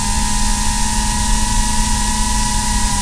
Mini Quadcopter Flying Loop